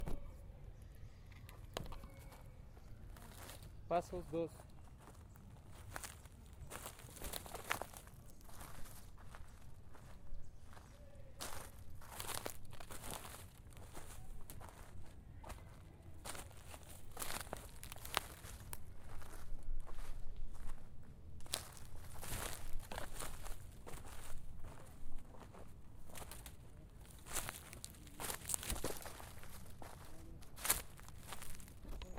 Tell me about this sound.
Steps on grass
Sonidos de ascenso por gradas a una resbaladero
grass, jump, resbaladera, steps